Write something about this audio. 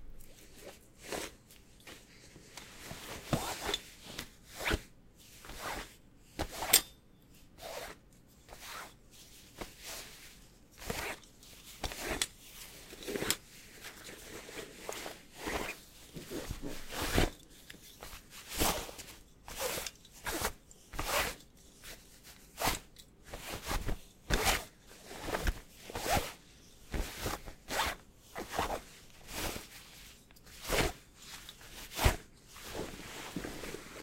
fabric rustling and sliding
me sliding a pair of lock-jaw pliers on some jeans in an attempt to try and get that zipper-like unholstering sound.
rustling, foley, fabric, movement, clothes, jeans, sliding